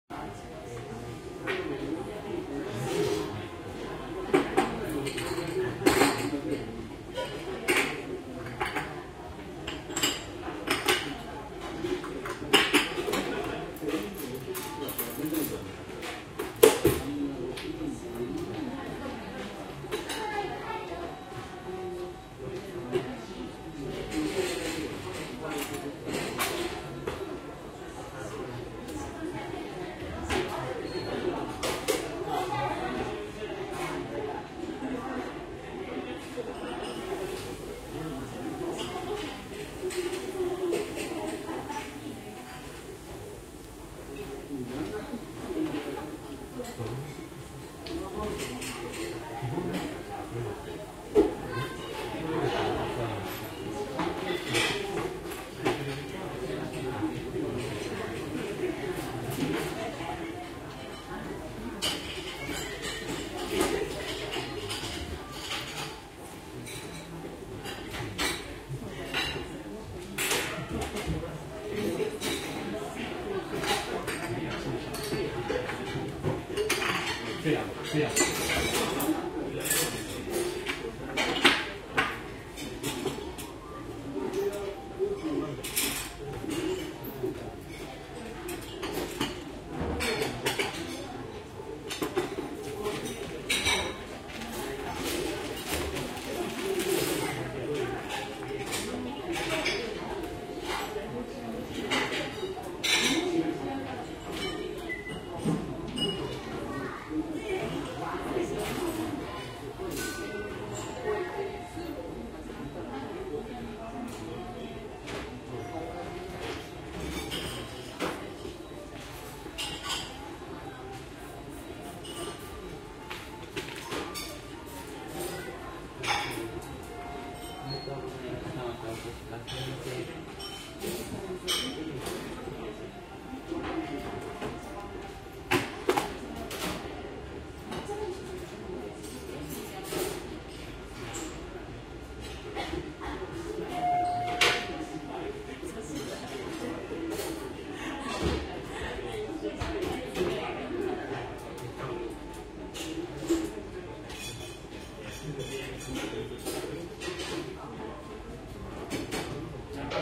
cheap restaurants in japan
ambience, city, field-recording, noise